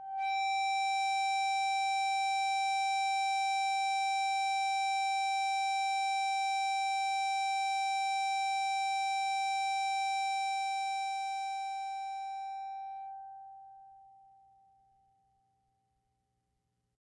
EBow Guitar G4 RS
Sample of a PRS Tremonti guitar being played with an Ebow. An Ebow is a magnetic device that causes a steel string to vibrate by creating two magnetic poles on either side of the string.